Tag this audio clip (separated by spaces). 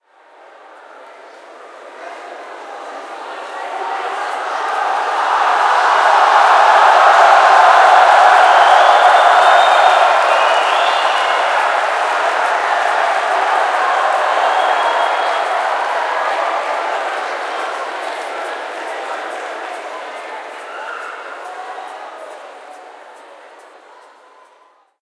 audience big cheer cheering concert crowd entertainment event games hall loud people sports stadium